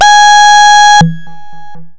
Overdrive pulse wave G#5
This sample is part of the "Overdrive pulse wave" sample pack. It is a
multisample to import into your favorite sampler. It is a pulse
waveform with quite some overdrive and a little delay on it..In the
sample pack there are 16 samples evenly spread across 5 octaves (C1
till C6). The note in the sample name (C, E or G#) does indicate the
pitch of the sound. The sound was created with a Theremin emulation
ensemble from the user library of Reaktor. After that normalizing and fades were applied within Cubase SX.
multisample, overdrive, pulse, reaktor